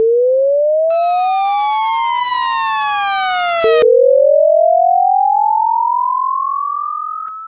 WAREMBOURG Sabrina 2017 2018 policealarm
The purpose of this sound was to regain the sound of alarms that can be heard when a police car passes all ready for our car.
For that I have at first,
To Create this noise:
- Chirp - Sinusoid
- Then duplicated the noise on a second track
- Once duplicated I reversed, track 2 the sense of noise thanks to the effect (inversely of the senses)
- Later I created a gap between the two tracks playing with the spacebar so that the noises are off.
- I then copied and pasted the sound of track 1 to add it next to the noise of track 2 and vice versa (adding the noise of track 2 on track 1
By creating both offset and fluidity of the sound it gives the impression that the noise is continuous like a siren
alarm-alert, emergency-warning